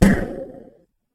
electro bounce
video game sounds games
games, sounds, video